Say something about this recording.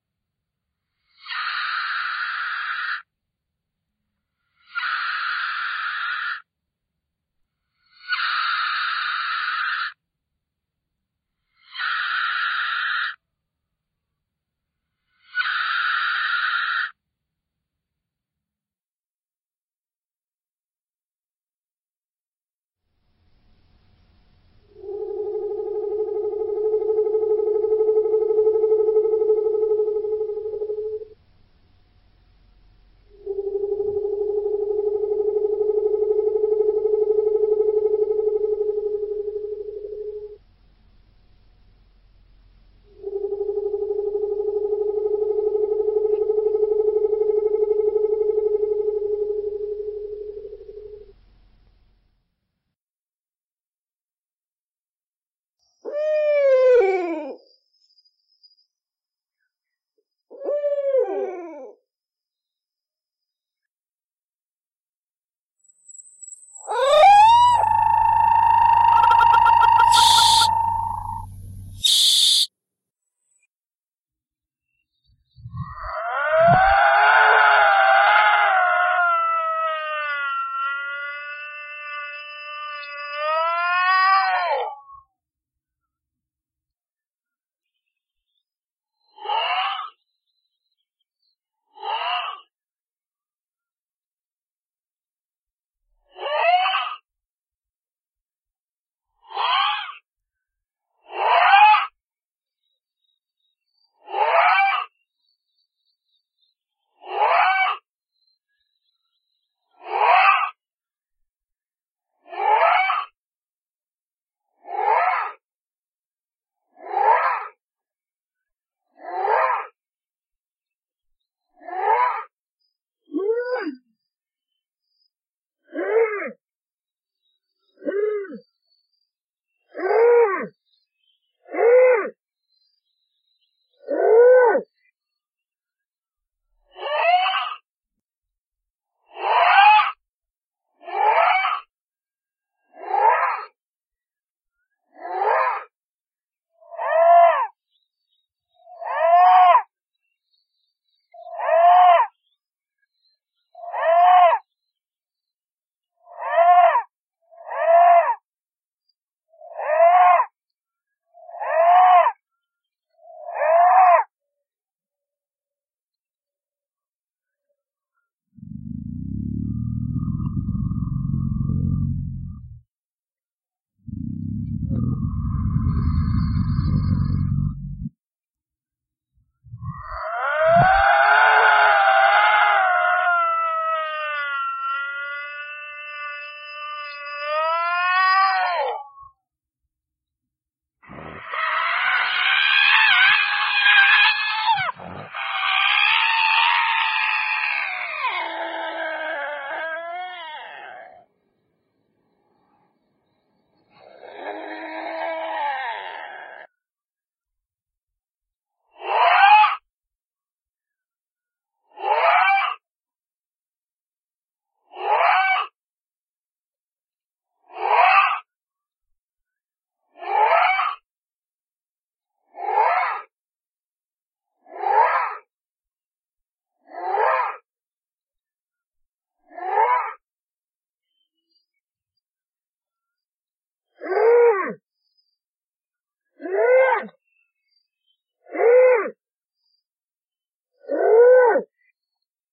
Unholy animal and mosnters sounds from my ward
creepy, unholy, monster, creatures, horror, dead-space, unerving, scary, demon, evil, videogame, zombie